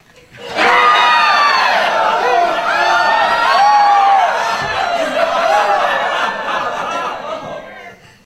Mixed Crowd Reactions
Recorded with Sony HXR-MC50U Camcorder with an audience of about 40.
mob, reactions, audience, crowd